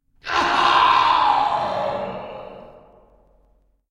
Man screams in pain - in a big hall
Man's cry of pain in a large hall with reverberation
before, human, scream, reverberation, hall, from, great, pain, man, male, voice, screams